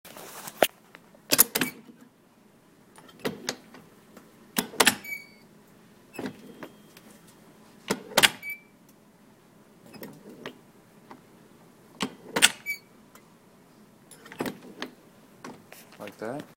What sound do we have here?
Train connect 2
Mechanical sounds of a manual button making machine "ka-chunks" and squeaks, used to simulate two train cars connecting in an animated video